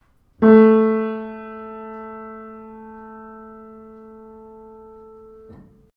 Piano playing A440